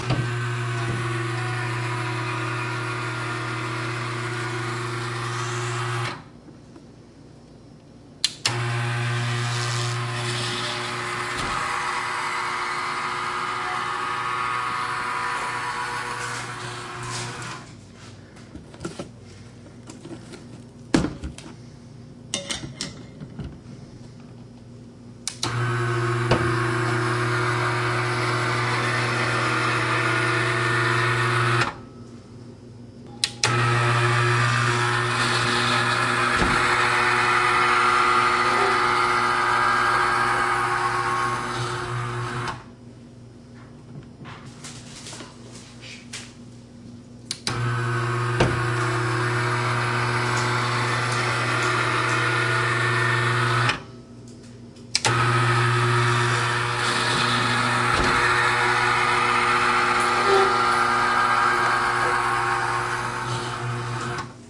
A retractable, motorized stovetop ventilator. It makes these really cool noises when it moves.
Recorded with a Canon GL-2 internal mic. (Check out the second and third pass, the camera was much closer than in the first)